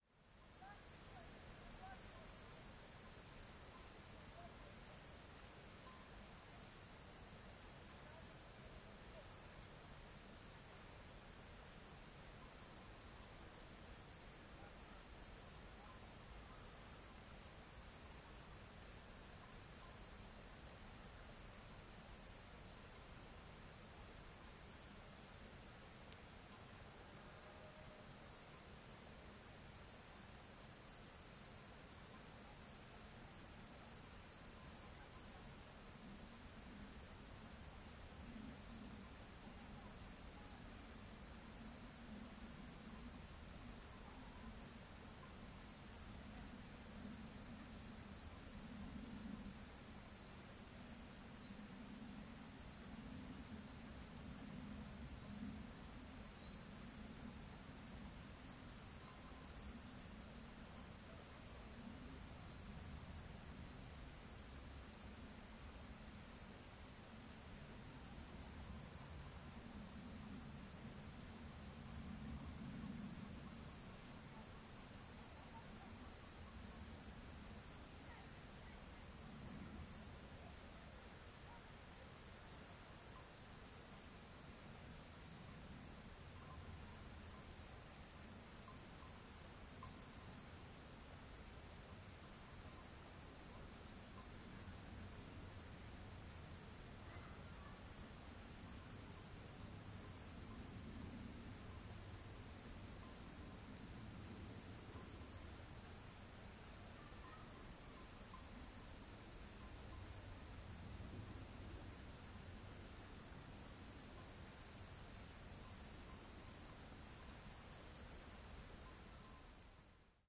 AMB Summer field countryside distant people cowbell high trees
Field recording in rural Serbia. Mid afternoon in August.
Voices in the distance fade away, a light wind in the trees.
Stereo shotgun mic on a boom pole, 2m50 up in the air.
Recorded in 2010.